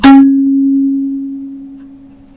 Kalimba note7
A single note from a thumb piano with a large wooden resonator.